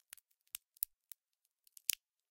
Breaking open a pecan using a metal nutcracker.